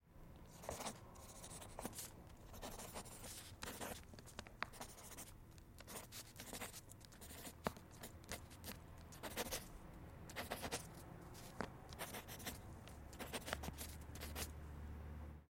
Pencil writing
Me writing and drawing with a lead pencil onto paper.